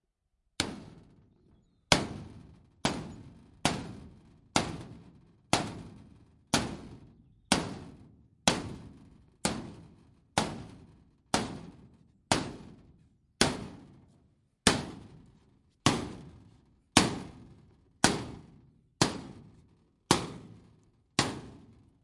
Metallic Hits Various 2
Bang, Boom, Crash, Friction, Hit, Impact, Metal, Plastic, Smash, Steel, Tool, Tools